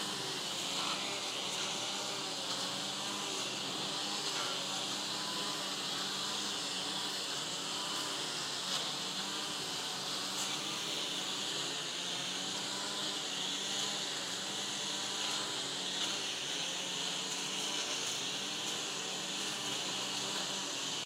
In the Carmel Park (Parc del Carmel) of Barcelona, a set of 3-4 lawn mowers cutting the grass in the distance. Sound recorded with the Mini Capsule Microphone attached to an iPhone.